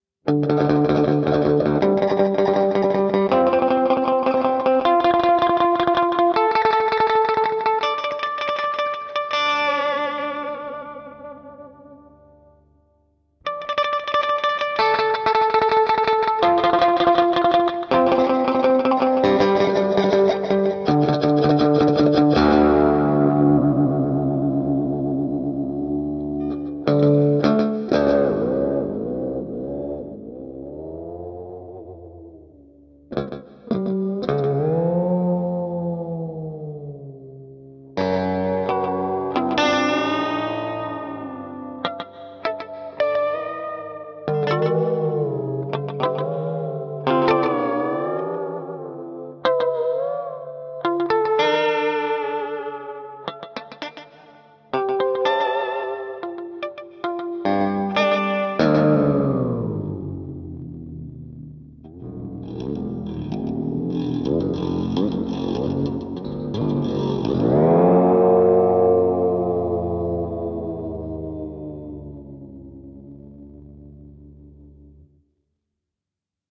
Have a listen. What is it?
Clean type of heavy reverb sound. The notes start off fast and almost surf rock like then in the middle just a bunch of random bendy/vibrato/tremolo noise which would probably work for some background noise. Chop this one up to your hearts desire.